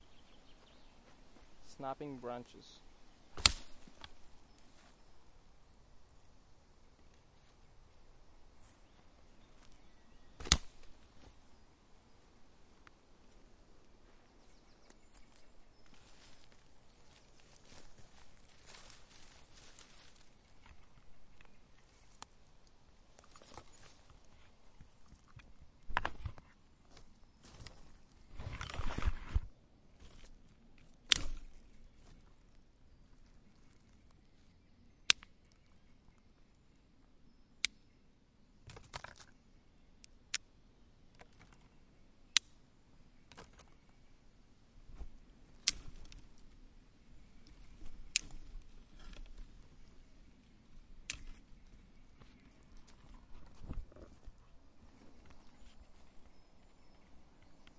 Branches being snapped
Recording of me snapping branches and twigs in a forest in Ireland during the summer.
Recorded with Tascam DR-05